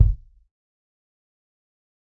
Dirty Tony's Kick Drum Mx 024
This is the Dirty Tony's Kick Drum. He recorded it at Johnny's studio, the only studio with a hole in the wall!
It has been recorded with four mics, and this is the mix of all!
dirty, drum, kick, kit, pack, punk, raw, realistic, tony, tonys